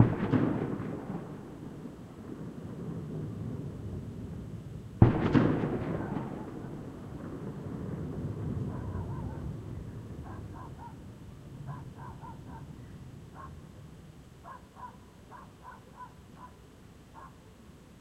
120527 08 Dynamite 4 [double]
Large explosion - dynamite during a fiesta in the Sacred Valley, Cuzco, Peru. Long natural mountain echo.Recorded with a Canon s21s.
dynamite, explosion, fiesta, Peru